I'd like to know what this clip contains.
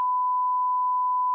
Just a TV beep sound